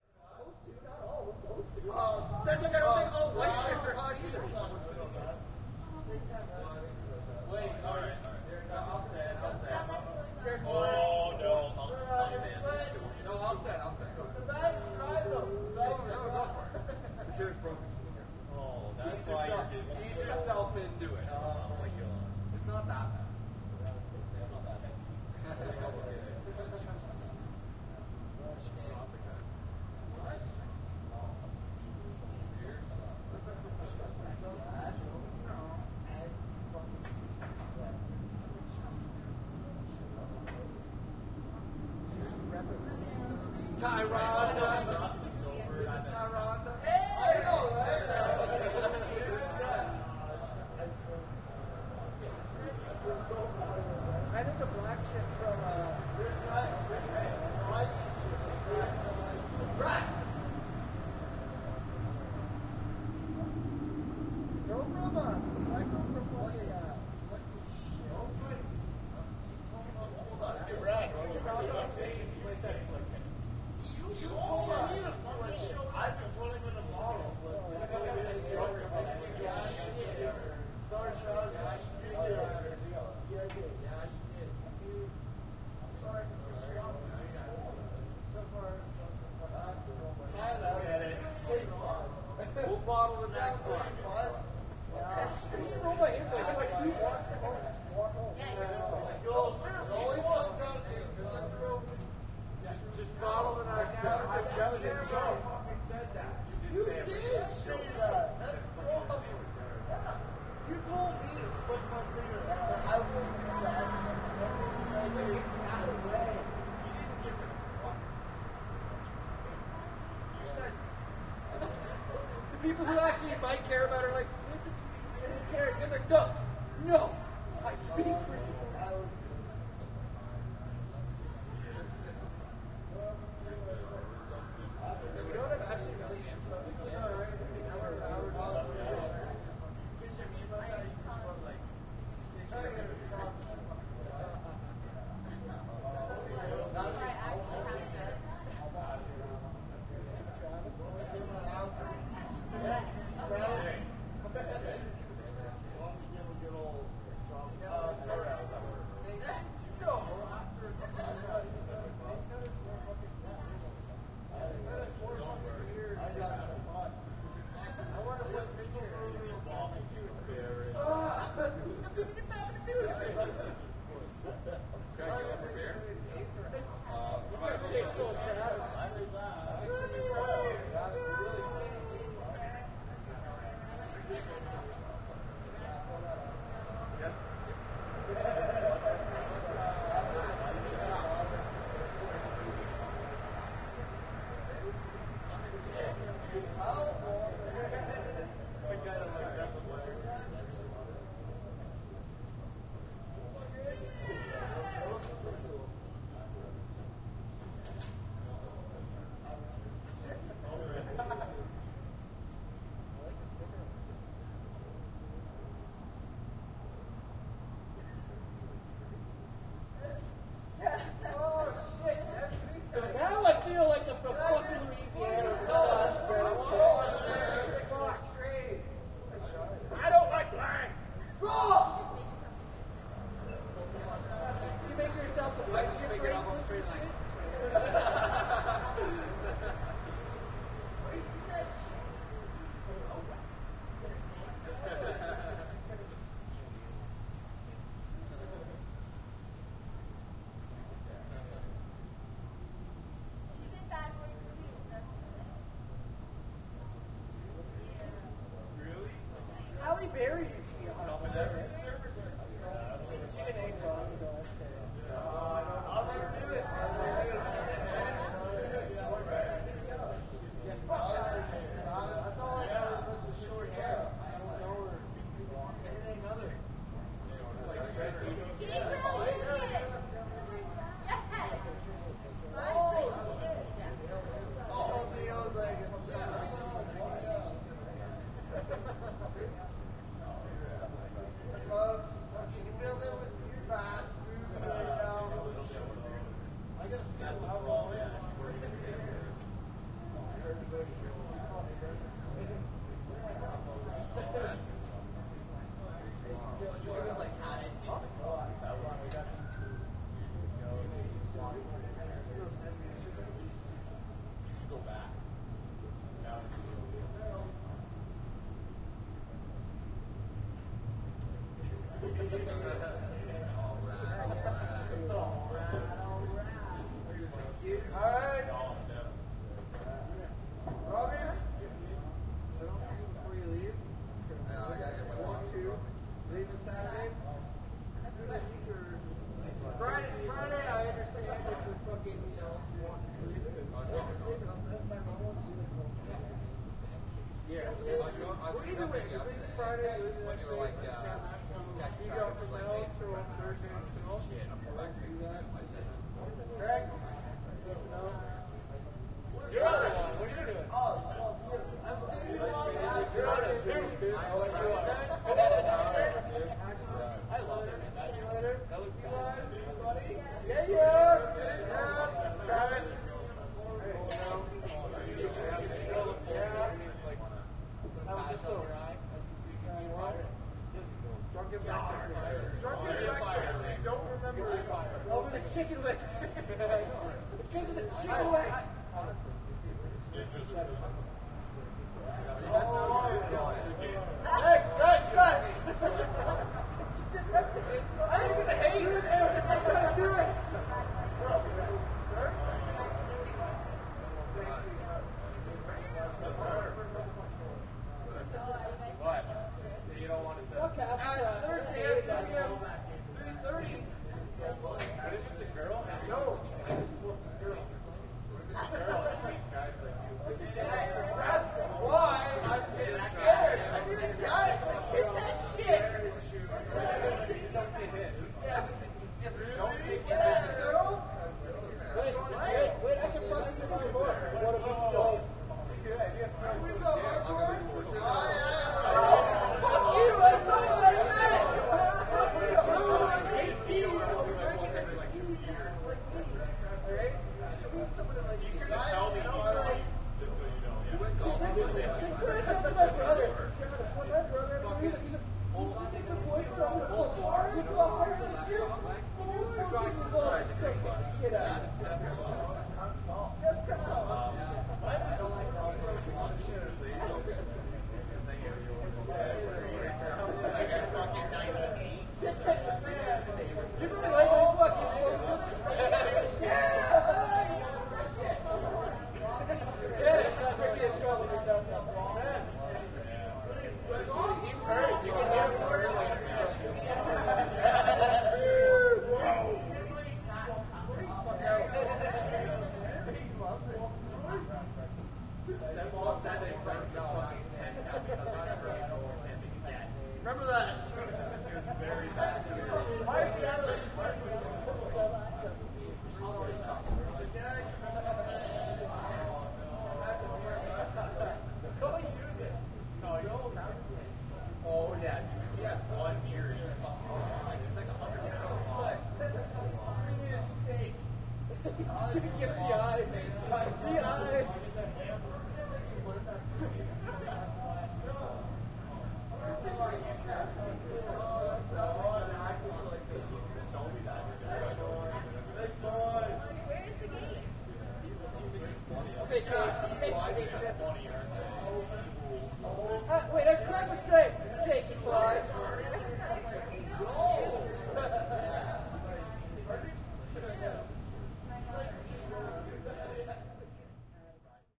Extended teenage or older voices, party, Canadian, distant (2011). Sony M10.